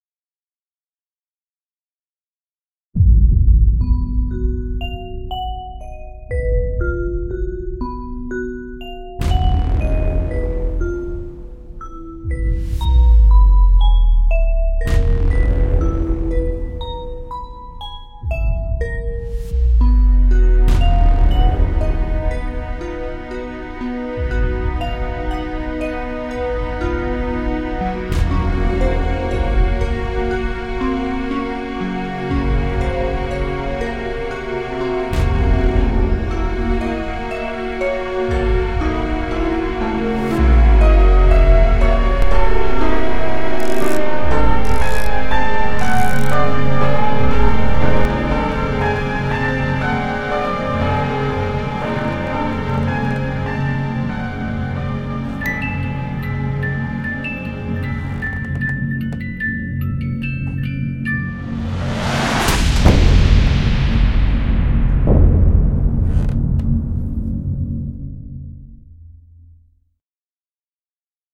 Audio for trailers, short video, creepy
Music-Production, sound-design, effects